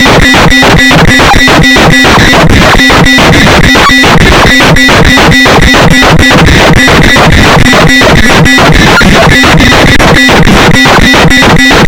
Glitch Beat 5
glitch, coleco, murderbreak, rythmic-distortion, circuit-bent, core, bending, just-plain-mental, experimental